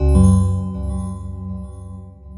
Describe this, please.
game button ui menu click option select switch interface
button, click, interface, select, ui